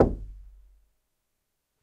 Door Knock - 20
Knocking, tapping, and hitting closed wooden door. Recorded on Zoom ZH1, denoised with iZotope RX.